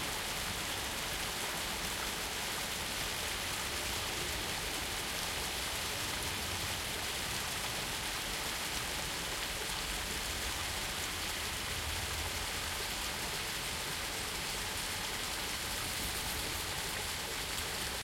Tony Neuman park streaming close
Recording of a small pound and streams present in Tony Neuman`s Park, Luxembourg.
field-recording, park